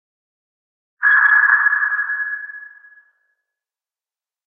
A dual mono recording of active sonar. Made by pitch shifting a recording of a modern depth finder.
active-sonar, loop, ping, sonar, submarine, u-boat